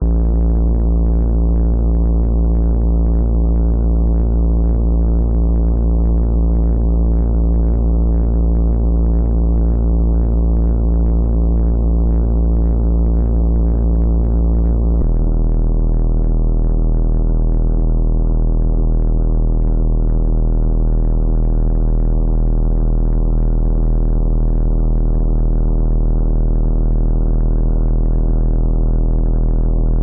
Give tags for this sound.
creepy distorted distortion drone tape vhs

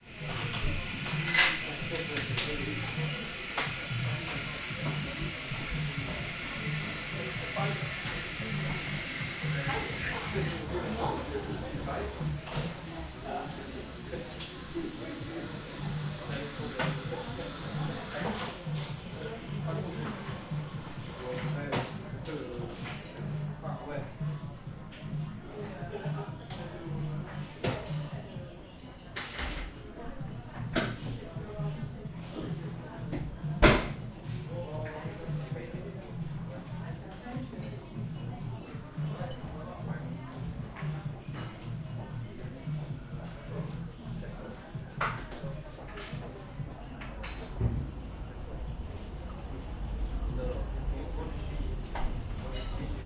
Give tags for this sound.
cafe france lunch paris parsian

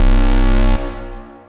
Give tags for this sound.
alarm futuristic game gamedev gamedeveloping games gaming high-tech indiedev indiegamedev loop science-fiction sci-fi sfx video-game videogames

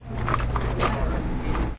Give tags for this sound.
aip09 chair floor roll rolling wheels

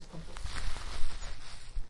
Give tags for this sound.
pop bubblewrap dare-9 plastic-wrap wrap popping bubbles

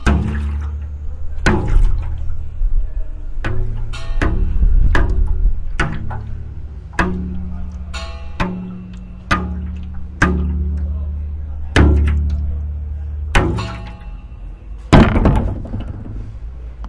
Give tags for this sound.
water jugs ai09 plastic hum

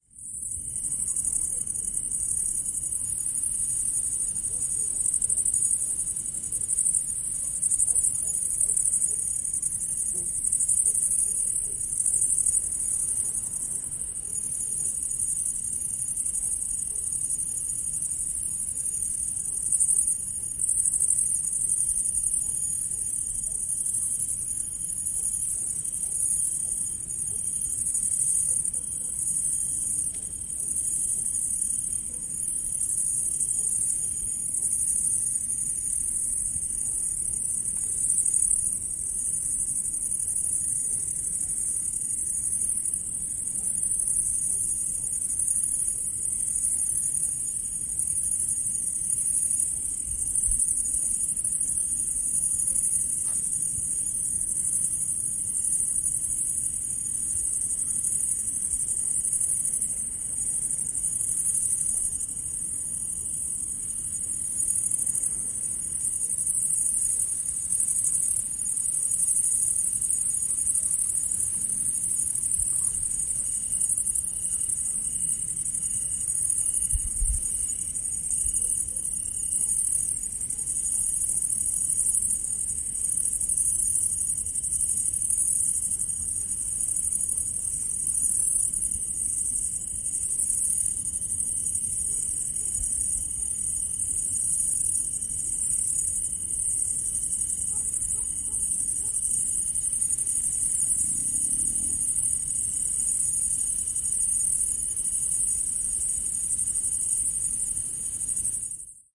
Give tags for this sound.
grasshopper insects athens mountain pendeli crickets fieldrecording

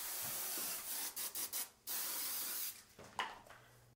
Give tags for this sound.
class intermediate sound

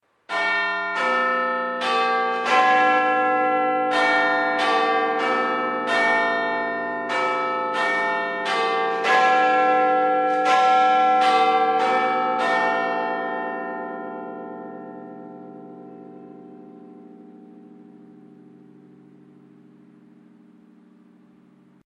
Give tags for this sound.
hourly chimes

